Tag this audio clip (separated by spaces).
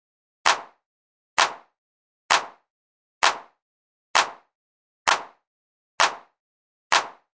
club dance samples